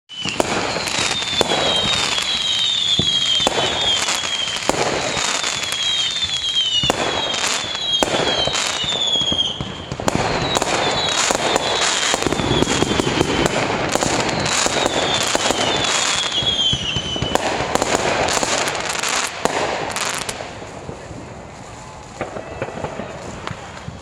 Here is a firework show sound effect. Recorded on July 4th 2020.
Enjoy!